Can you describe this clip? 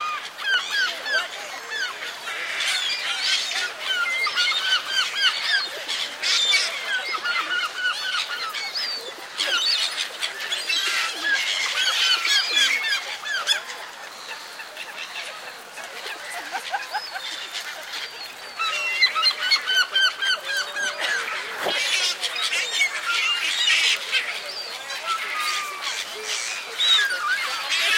Birds in a park in Westminster recorded on Zoom H4